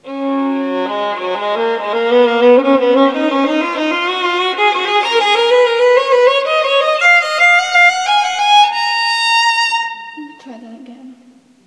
Baroque Phrases on Violin. Improvising on a Whole G Major Scale while Ornamenting.